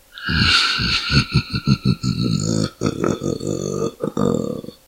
New laughs for this years Halloween!